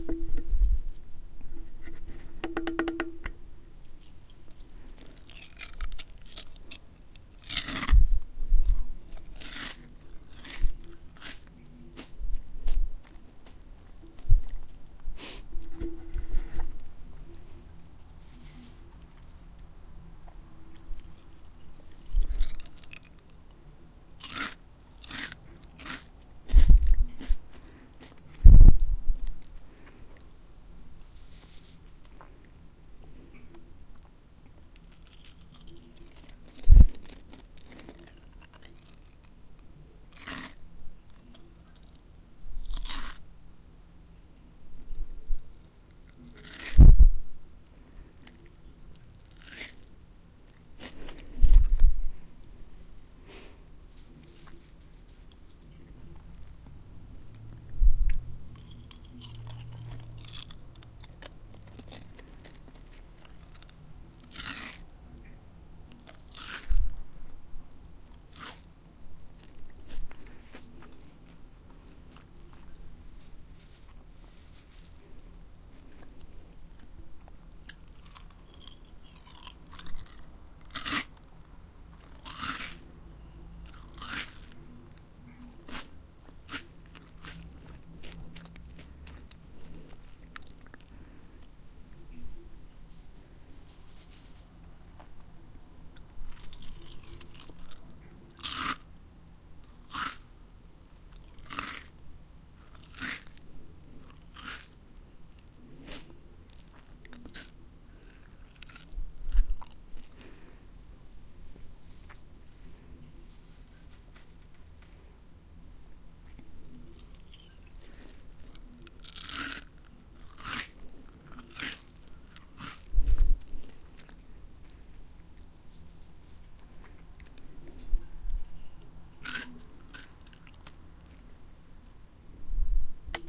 crunching on cereal.
chewing, chips, crunch, crunching, eating